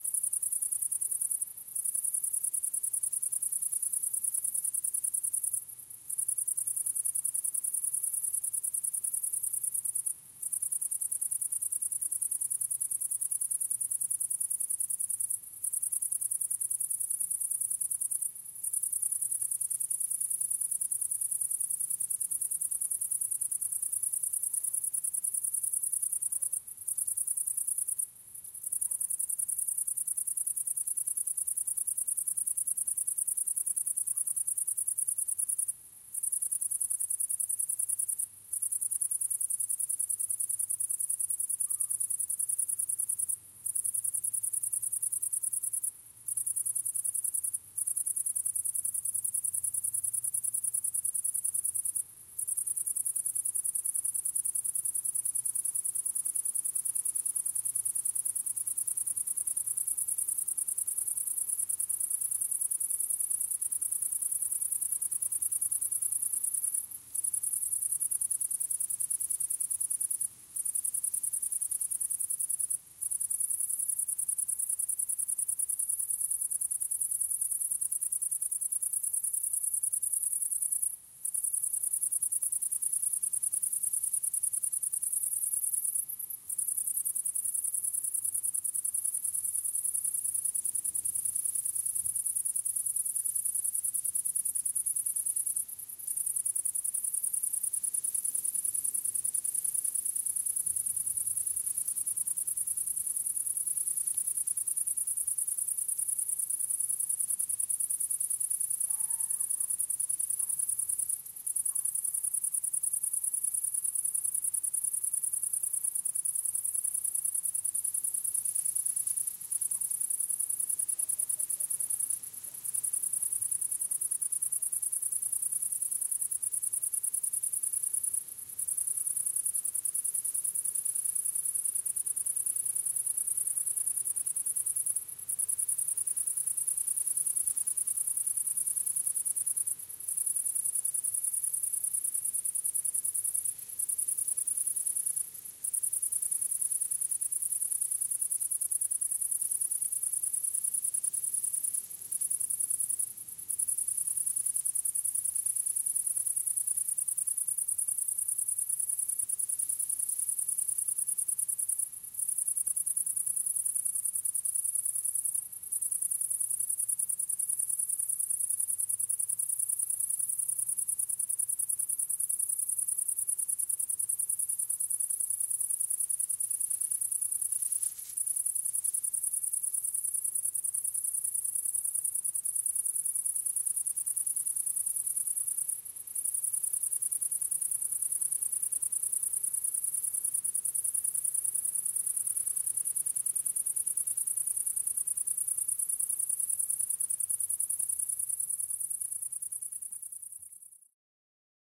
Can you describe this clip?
midnight grasshopper
A foreground grasshopper around midnight. Other grasshopper further.
Some light wind makes high dry gasses wrinkling. A faraway dog
Nivillac, France, jully 2022
Recorded with a pair of Clippy EM 172 in an AB setup
recorded on Sounddevixe mixpre6
nature, Britany, France, night, insects, crickets, ambiance, grasshopper, summer, field-recording, hot